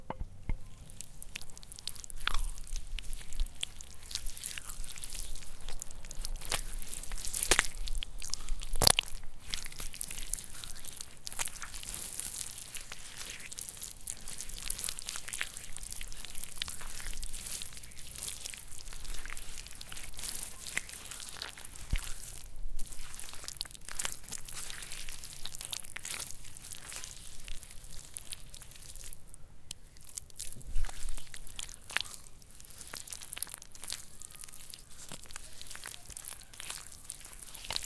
mud squish
I recorded my hands sloshing around in wet mud at a very close range. The sound could work for any variety of wet, squishy noises. Created for a personal video project but I thought I would share. Recorded on a ZOOM mic at 4800 Hz
dirty, slug, outdoor, wet, mud, squirt, dirt, hands, woods, squish, nature, squash, organic, slimy, muck